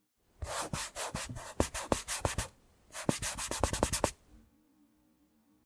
Touchpad, sliding
Using the bar of touchpad.
Computer, CZ, Czech, Panska, sliding, touchapd